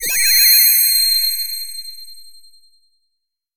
High frequency ringing sound with with fast vibrato increasing over
time then flattening as center frequency (MIDI 108 = 4186 Hz) loses
harmonics.